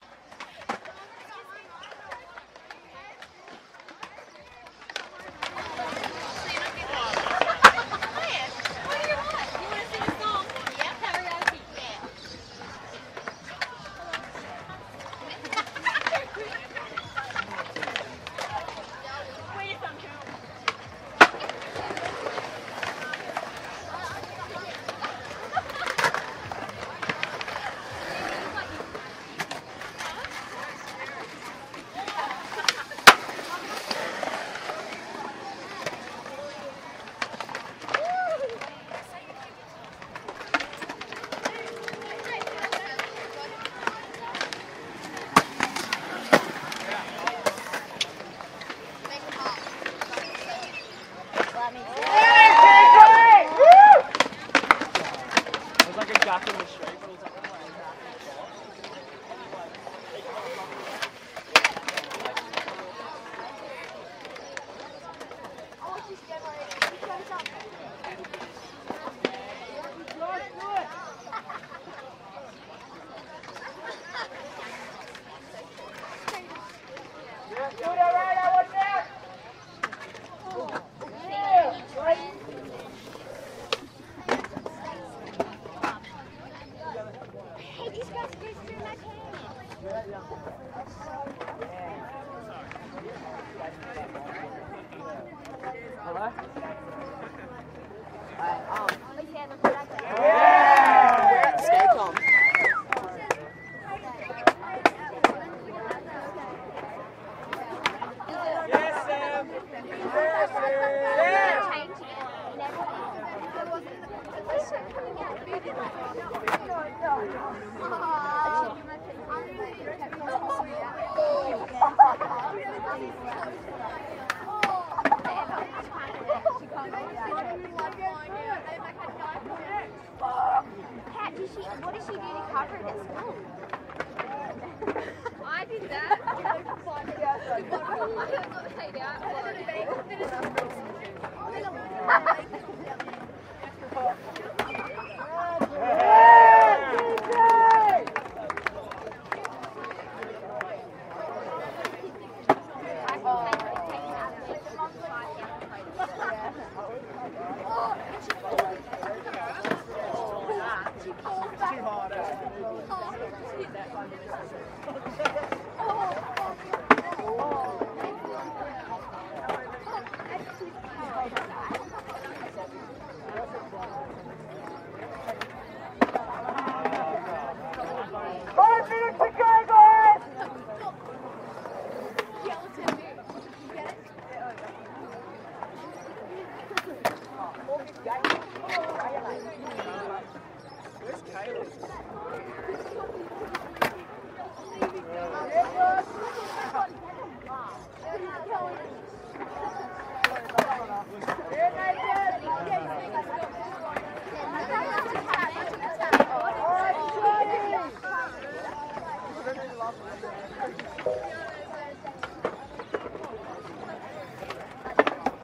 Skatepark competition atmos
Recorded at a skate competition at Port Macquarie Skate Park, teenage conversations, applaud and cheering after landing tricks, birds and skate sounds.